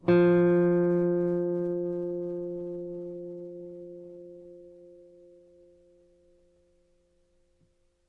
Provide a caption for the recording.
1 octave f, on a nylon strung guitar. belongs to samplepack "Notes on nylon guitar".